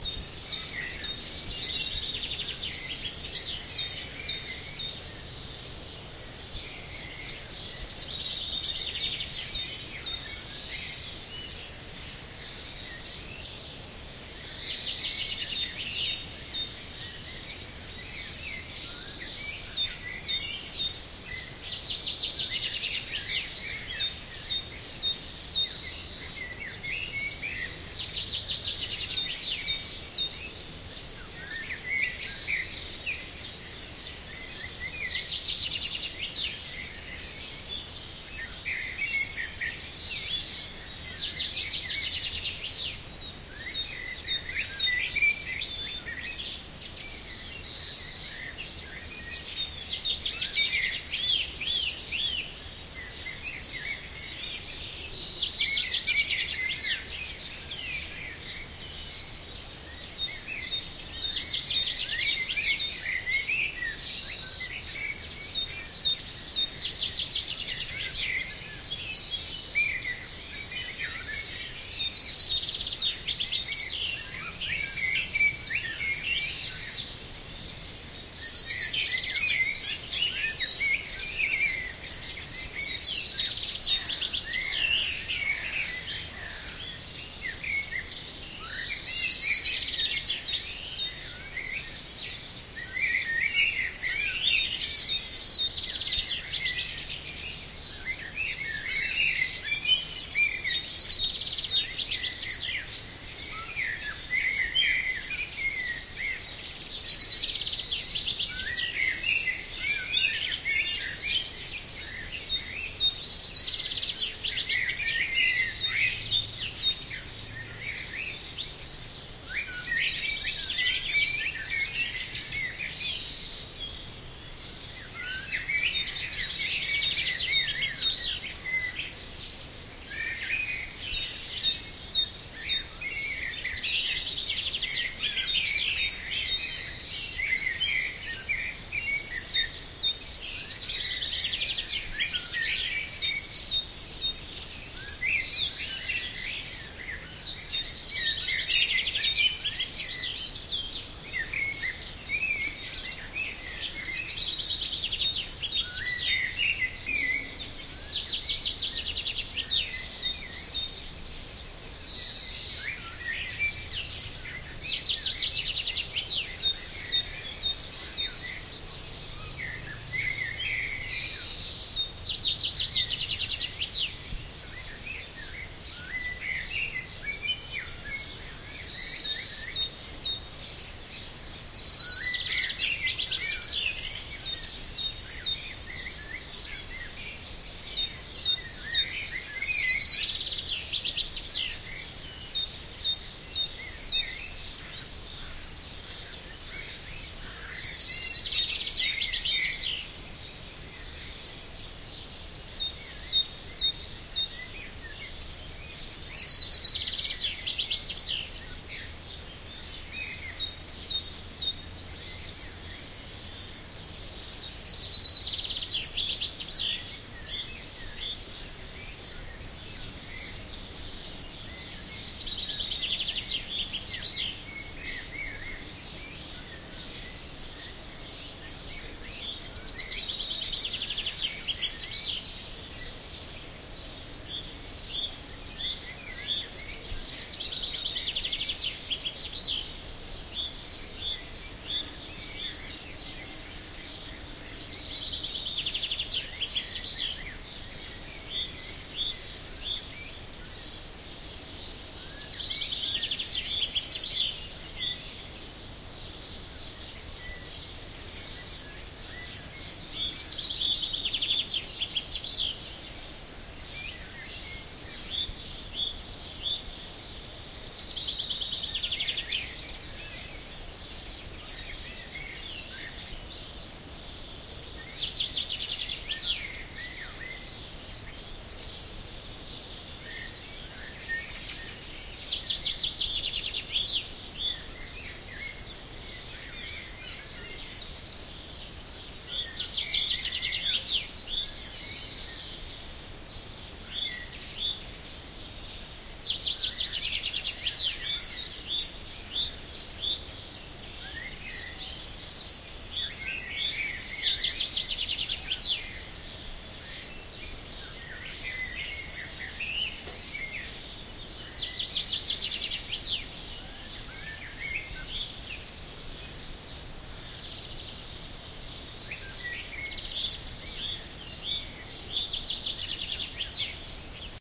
The recorded souns are a choir of birds in spring dawn - in ours garden about 4,30 hours.
bird, chor, dawn